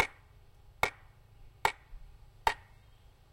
Hitting a mid-size tree trunk with a foot-long, 2" diameter portion of a tree branch. Unprocessed.
field-recording, hit, tree